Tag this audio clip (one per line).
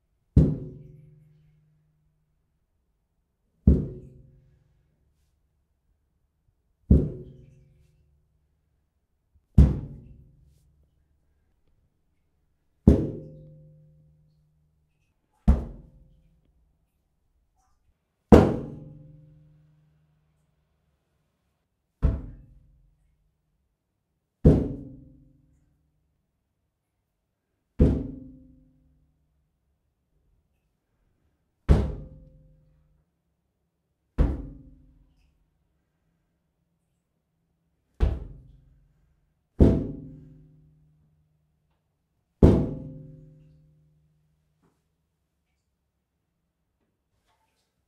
bathtub
impact-head-bathtub
head
foley
impact